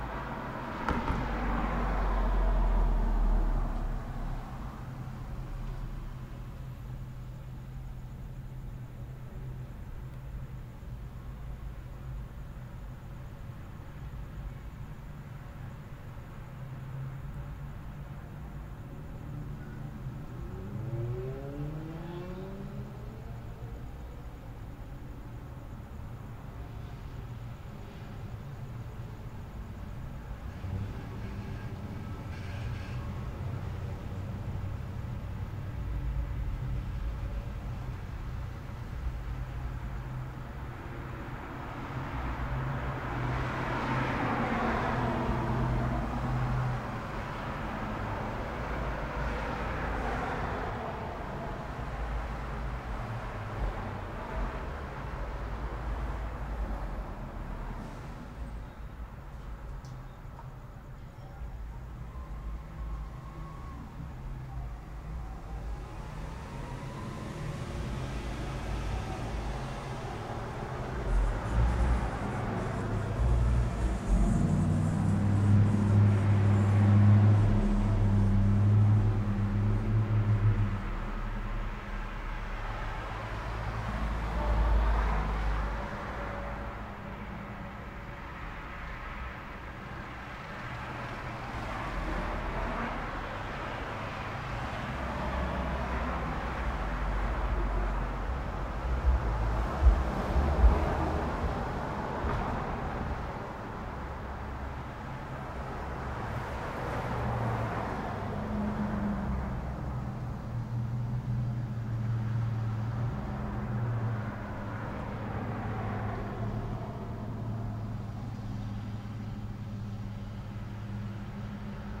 Street Noise
city, field-recording, noise, street, streets, traffic